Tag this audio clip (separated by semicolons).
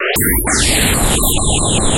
additive; digital; noise; synth; synthesis; synthesizer; synthetic; weird